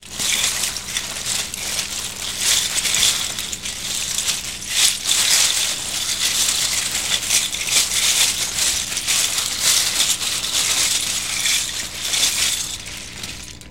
LEGO Pour 3
Pouring out a bag of LEGO bricks on a table
click, brick, bag, LEGO, pour, fall, toy, plastic, drop